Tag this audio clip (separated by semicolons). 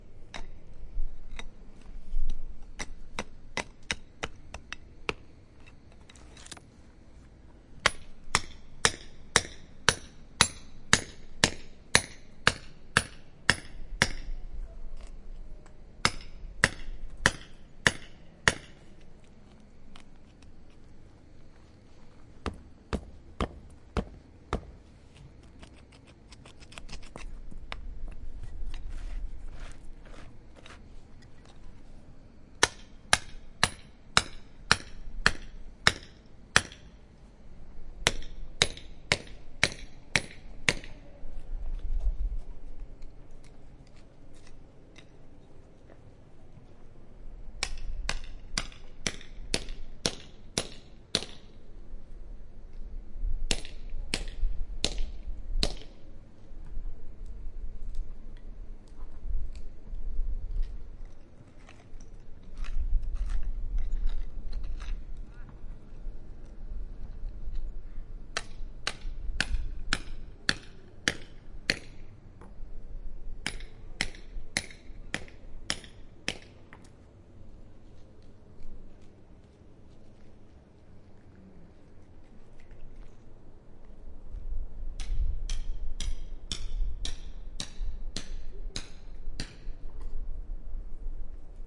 building; construction; hammer; hammering; work